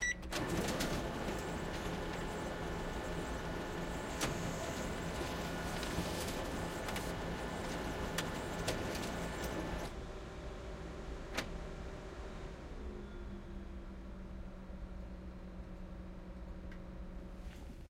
Another slice of tree bound for the dustbin, a photocopier makes a single copy. Recording chain: Audio Technica AT3032 (stereo mic pair) - Edirol R44 (digital recorder).